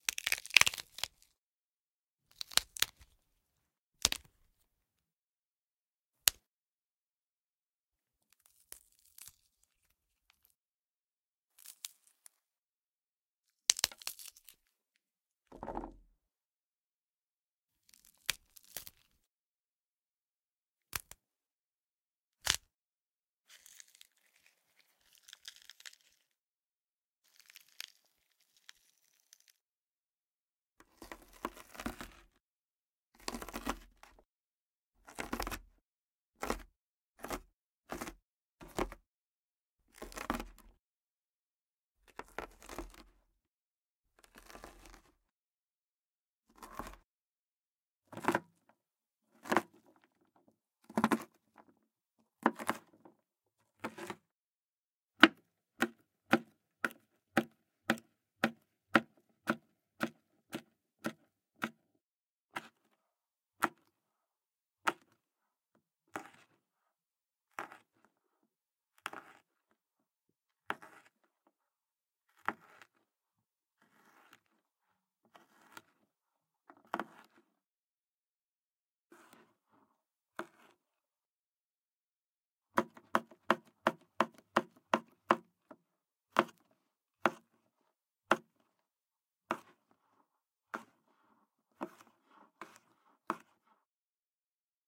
A variety of green bean sounds, recorded on a Sennheisser MKH60 microphone.